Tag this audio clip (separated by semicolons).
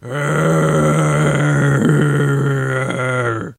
zombie; dead; brains